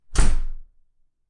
Door slam inside
shut, door, slam, close